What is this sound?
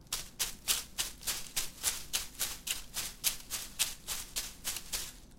Steps on grass.
steps, foley